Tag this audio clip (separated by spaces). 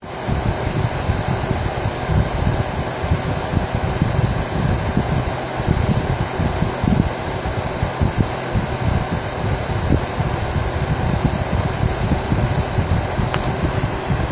buzz ambient